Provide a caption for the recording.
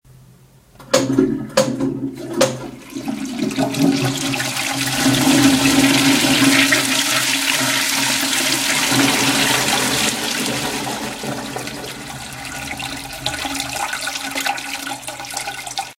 My toilet flushing device recorded with an iPod in the bathroom of my house.